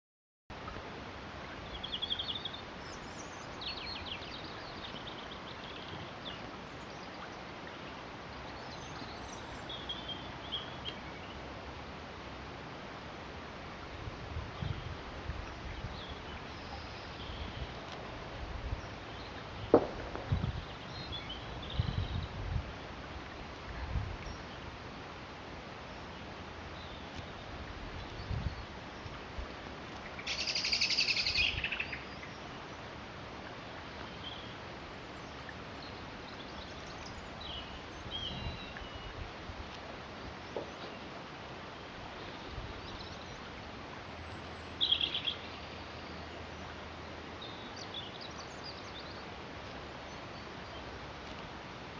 Robin, brook, blackbird alarm P1070021
Mid February near sunset in an English country lane, a brook nearby, robin song and a blackbird's alarm call. Extracted from a video taken with a Panasonic TZ-8 camera.
ambience, birdsong, blackbird, brook, country-lane, early-evening, England, field-recording, late-winter, robin, rural